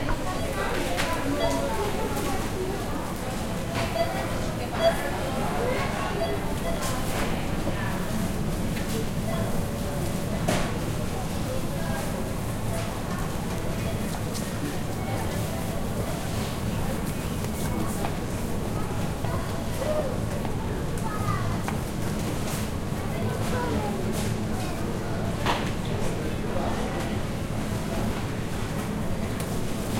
market - supermercato
market
lo-feelings